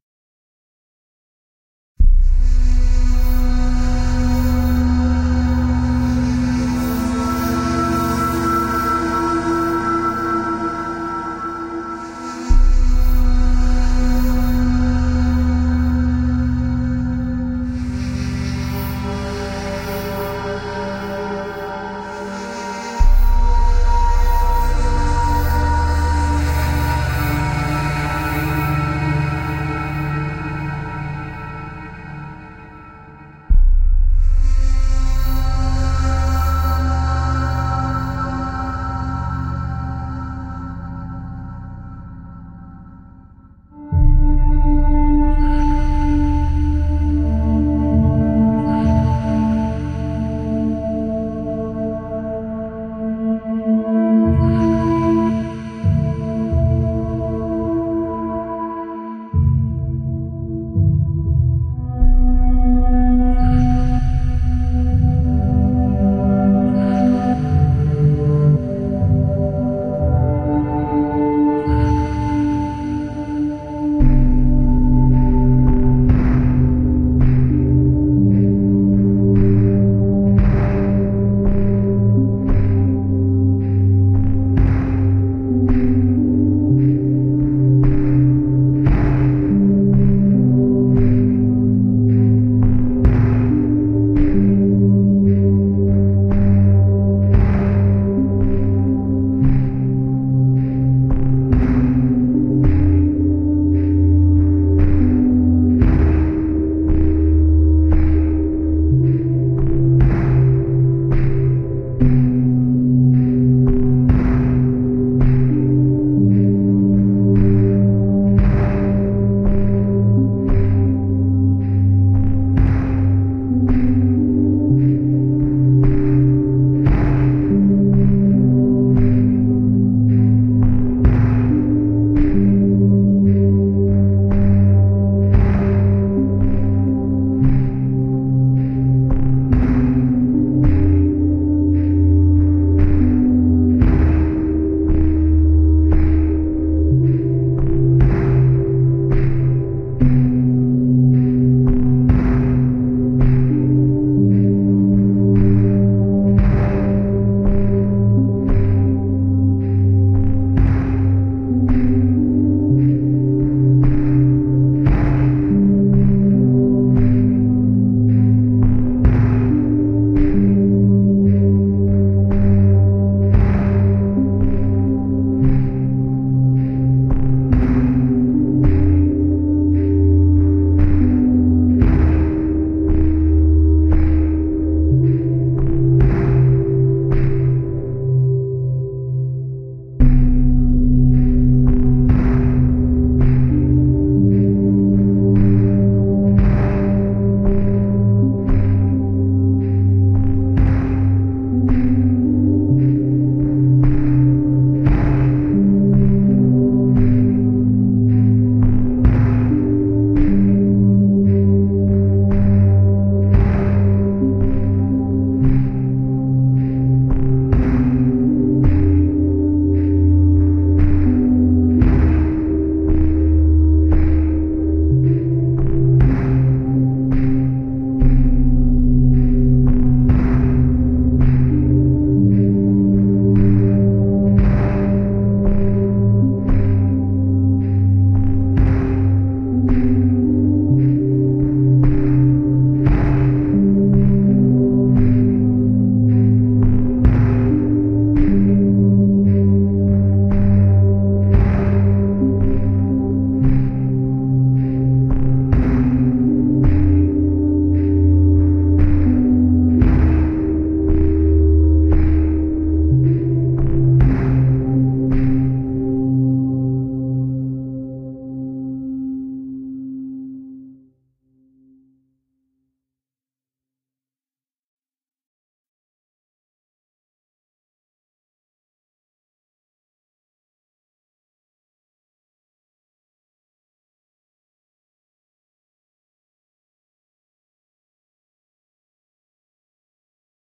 Cyberpunk Urban Walk
This is a soundscape I created for a ballet company of modern dancers. I wanted to create a musical ambient for a dancer to imagine to be in a cyberpunk futuristic scene and walking through a grey atmosphere where big, dark buildings, broken cars, dirty streets and heavy clouds are constantly oppressive. I basically used two synths to make it. Everything was recorded and mastered with Logic 9. The drones in the first part (no rhythms) are made with iZotope Iris. I've put three sounds of blowing wind and a white noise. With Iris you can choose different frequencies on sound samples you put in and play them like drones (forward, backward, both); plus you can add some reverb, delay, distortion. I added Space Design (Logic's reverb designer) to emphasize the effect of drones' motion. Last I used Ohmicide (from Ohm) for a little compression and harmonic distortion. When the rhythm comes in I used another Ohm plugin called Symptohm. It's a wonderful synth for drums, bass and pads.
ambient, atmosphere, ballet, dancing, dark, deep, drone, grey, modern, pad, sound-design, soundscape